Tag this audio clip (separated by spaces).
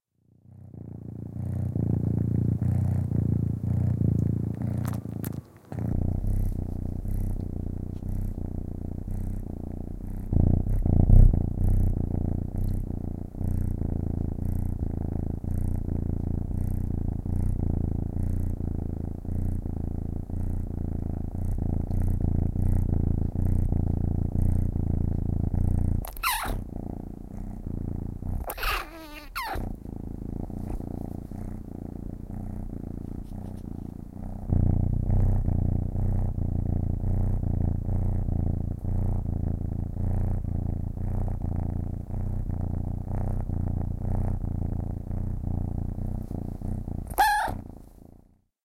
miaow purring stereo